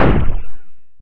Techno/industrial drum sample, created with psindustrializer (physical modeling drum synth) in 2003.
industrial, percussion, drum, metal